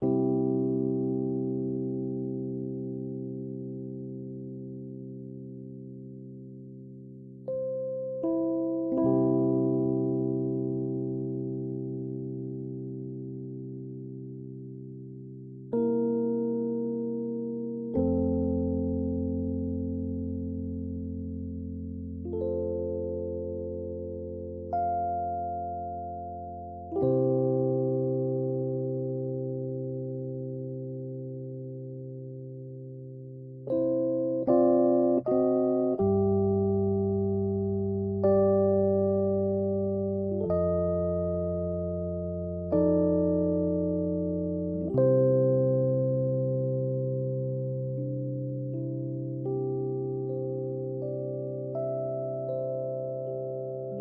Song5 RHODES Do 3:4 80bpms
Rhodes, loop, blues, beat, bpm, Do, 80, Chord, rythm, HearHear